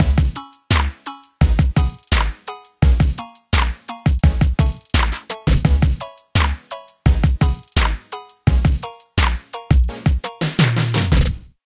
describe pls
righteous rhombus loop
hip, funky, trap, beat, fusion, music, loop